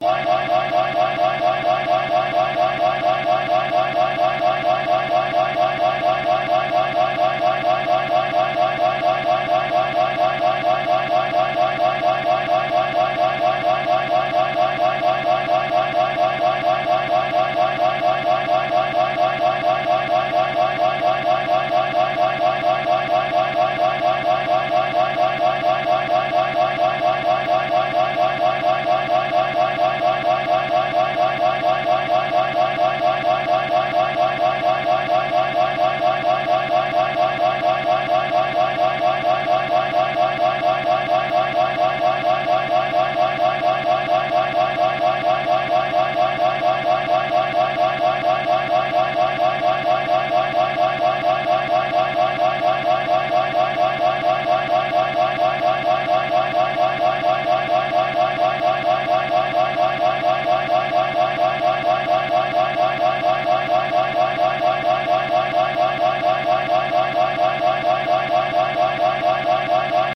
looping annoying scifi voice alarm
My voice saying most of the word "wide" taken from something else that I had been playing around with, each channel run through a process of Goldwave's mechanize effect, low-pass filter, and mechanize again with a different frequency in each channel to create a single sideband effect. Then ran it through pitch change with low fft, different pitch in each channel, to add more odd frequencies to my voice, then blended the channels together with through-the-skull effect, and finally snipped that bit out of the whole file and pasted several times.